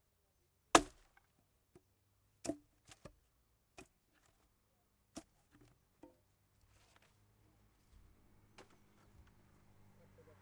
chopping fire wood with axe